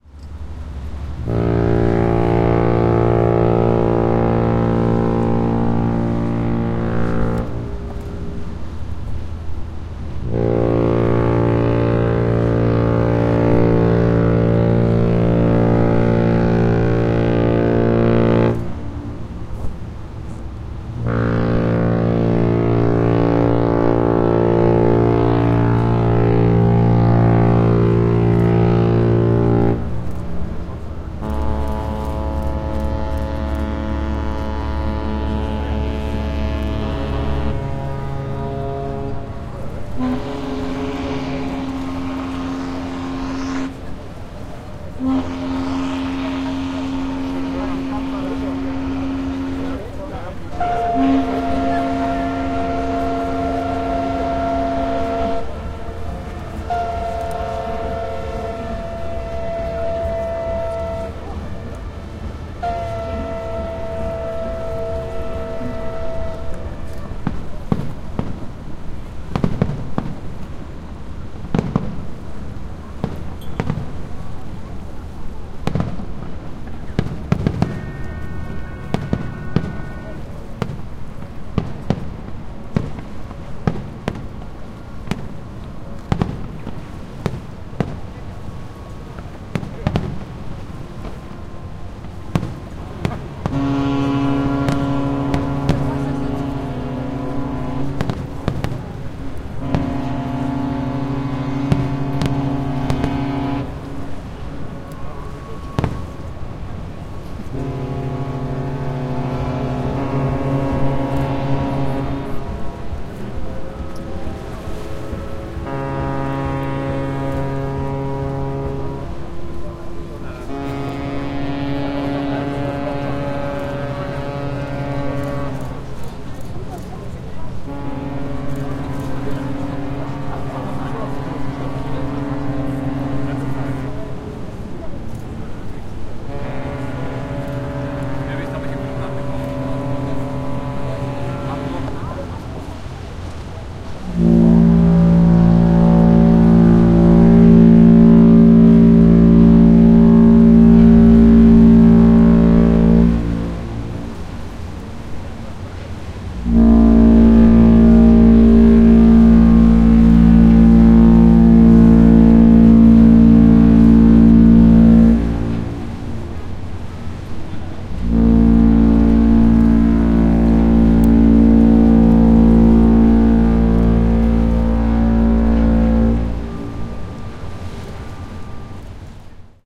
A mix of the atmosphere of the Hamburg Port Anniversary 2013. I mixed some of the recorded stuff into a dense atmosphere, including the big celebration fireworks. All the ships are horning to celebrate the day. Please look at my other sounds to get some of the horns isolated.